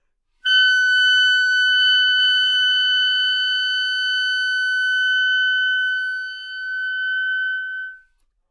Part of the Good-sounds dataset of monophonic instrumental sounds.
instrument::clarinet
note::Fsharp
octave::6
midi note::78
good-sounds-id::765
Intentionally played as an example of bad-dynamics-decrescendo